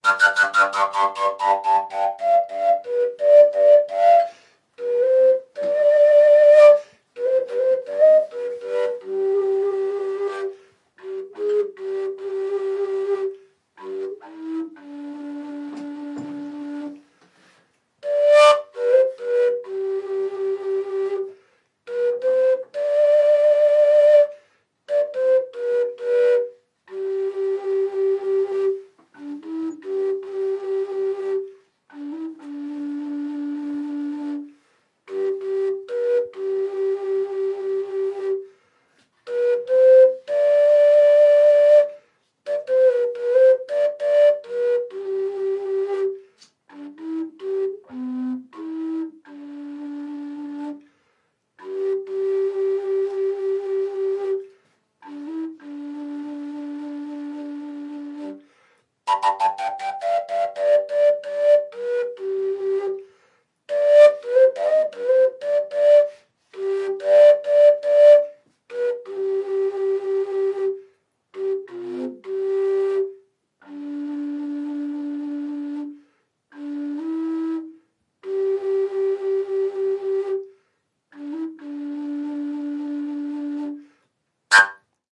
Sound of a slovak national instrument called fujara. Played by Frantisek Krstenik. It is technically a contrabass in the tabor pipe class. Ranging from 160 to 200 cm long (5'3" – 6'6") and tuned in A, G, or F. It has three tone holes (also called finger holes) located on the lower part of the main body. The sound is produced by a fipple at the upper end of the main body of the fujara. The air is led to the fipple through a smaller parallel pipe, air channel, mounted on the main body of the instrument. While it is possible to play the fundamental frequency on fujaras, the normal playing technique is based on overblowing the instrument. Because of the high aspect ratio of the sound chamber (great length versus small internal diameter), the player can use overtones to play a diatonic scale using only the three tone holes. The fujara is typically played while standing, with the instrument held vertically and usually braced against the right thigh.
ETHNIC, FUJARA, INSTRUMENT, MUSIC, SLOVAKIA